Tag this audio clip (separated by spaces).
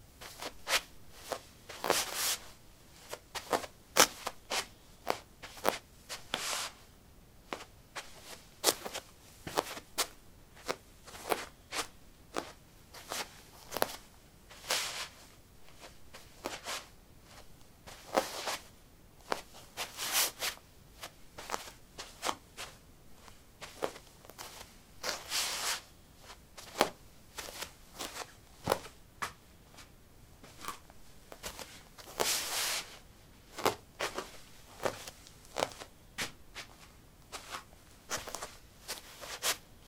steps footsteps footstep